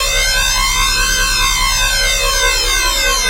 Some laser I made :P
drum, 4x4-Records, Music, Synthesizer, Electric, Sample, EDM, Dance, FX, Laser, kick, effects, Loop, J-Lee, bass-drum